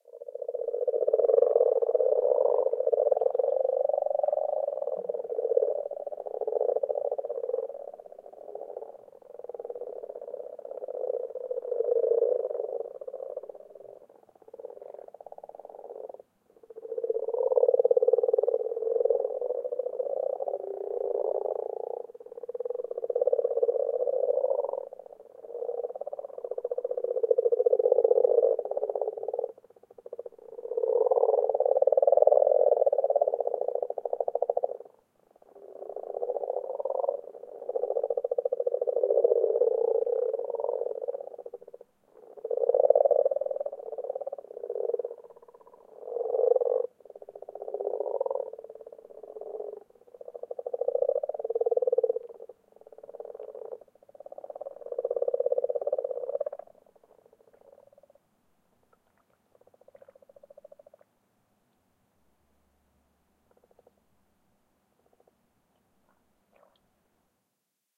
Frogs Croaking 3
A stereo field-recording of several frogs (Rana temporaria) croaking at the beginning of the mating season.Recorded closely at night so there are no birds present. Zoom H2 rear on-board mics.
xy, rana-temporaria, field-recording, croak, croaking, frogs, plop, pond, stereo